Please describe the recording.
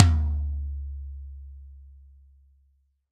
SRBP TOM 003
Drum kit tom-toms sampled and processed. Source was captured with Electrovice RE-20 through Millennia Media HV-3D preamp and Drawmer compression. This sample's tuning is from the drumkit as recorded and it would probably benefit from being pitched down a tone or so.
drum, drums, kit, real, sample, tom, toms